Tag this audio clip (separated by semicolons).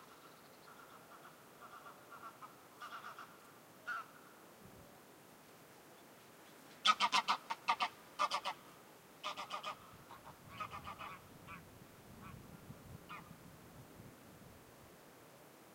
general-noise; birds; Sounds; flying; spring; geese; ambient; wings; birdsong; goose; field-recording; Bird; bird-sea; ambiance; nature; ambience